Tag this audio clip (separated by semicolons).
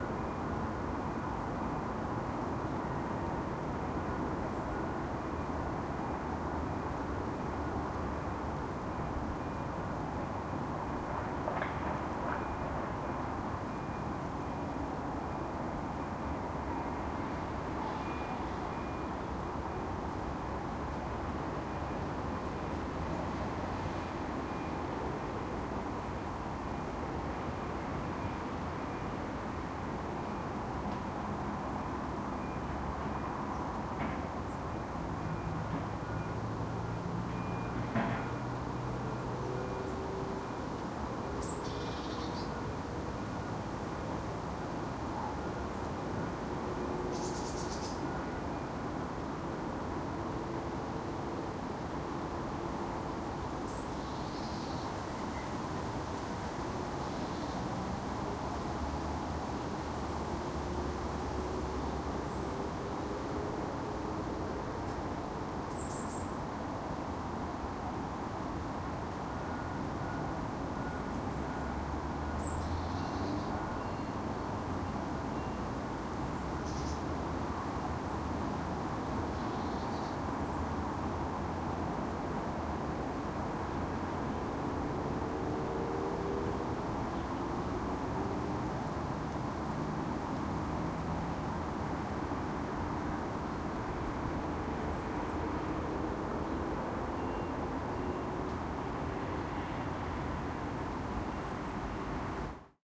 machinery; road; cars; vehicle